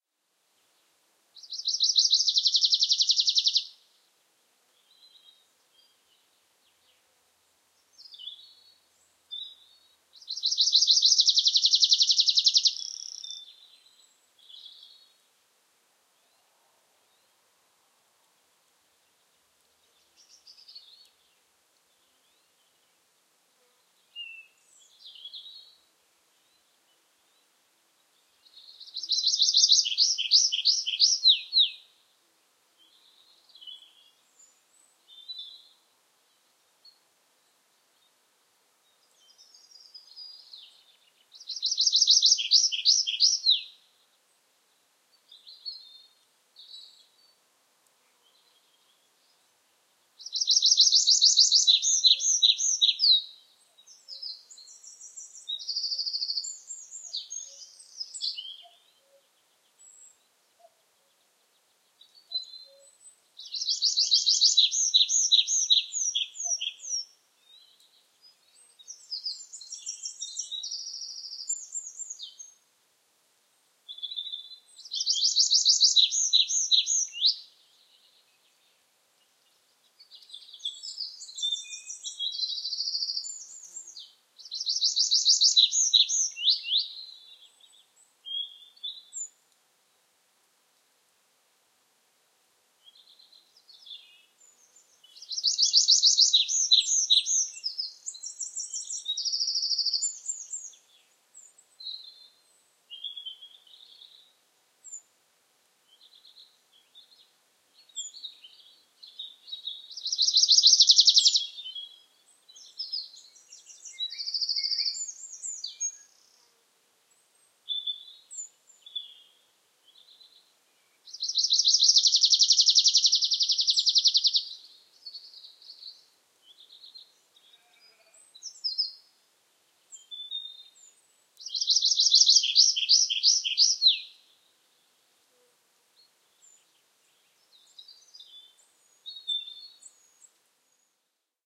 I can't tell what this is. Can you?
A stereo field recording of a Willow Warbler quite close (Phylloscopus trochilus) and a Wood Warbler (Phylloscopus sibilatrix) further away. Rode NT4 in Rode Blimp > FEL battery pre-amp > Zoom H2 line in.